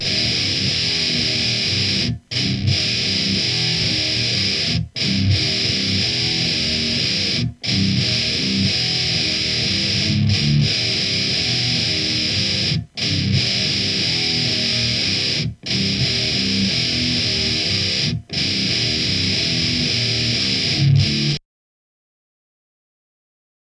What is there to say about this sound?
heavy loop2
190, bpm, groove, hardcore, loops, rythem, rythum, thrash